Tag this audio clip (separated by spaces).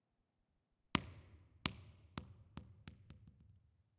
CZ Czech Panska